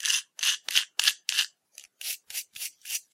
Pony-Hufe auskratzen 03
The cleaning of hooves of ponies / horses. Scratching and brushing imitated with the right tool but using a stone in default of having a real hoof. My daughter assisted.
brush, clean, hoof, horse, pony, scratch